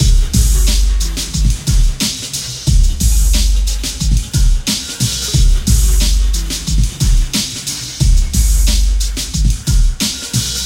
Synths & Amen Break - (90bpm)
Pack: Amen Drum kit by VEXST
Synth Loop 7 - (90bpm)
Synth Loop 6 - (90bpm)